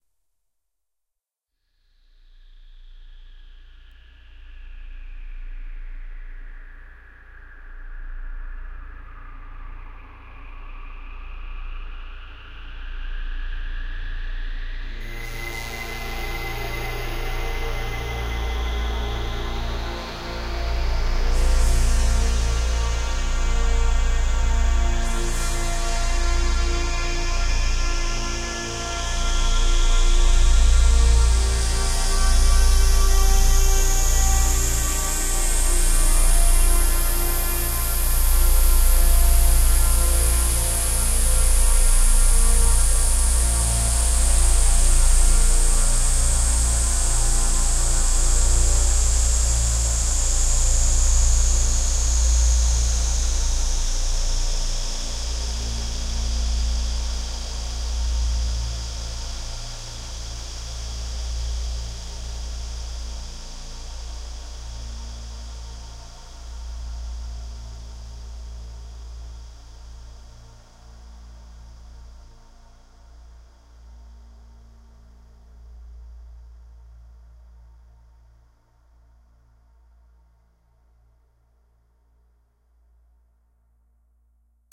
electronic musical instrument opening Am Anfang war 1
electronic musical instrument opening
electronic, instrument, musical, opening, synthesizer